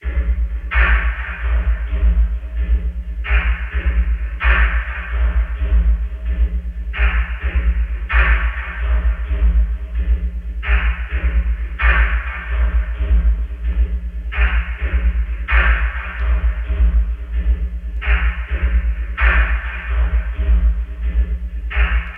Creepy Industrial Loop created by processing a oneshot snare drum hit.